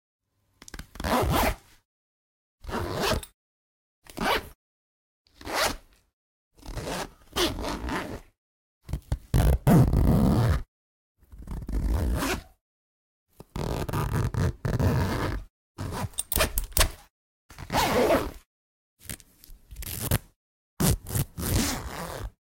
Recorded in mono with Zoom H1. Various zipper sounds from handbags,bags,purses etc. Interesting variety of timbres!